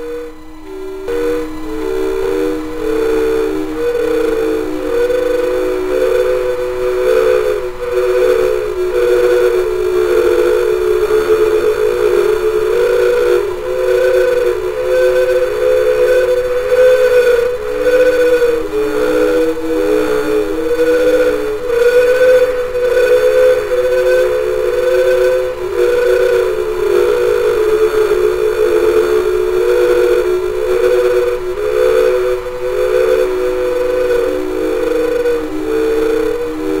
processed vocal recording through a modified sony tcm-200dv cassette recorder